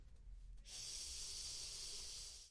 touch something rough